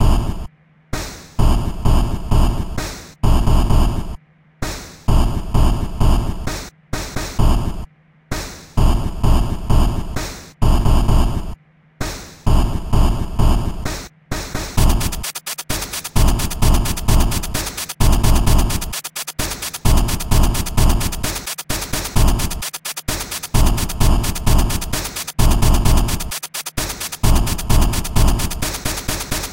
Old drumsoudns, 16 bars, in 130-bpm, retro tapesound.
Simple spice for a breakbeat, techno or electro project.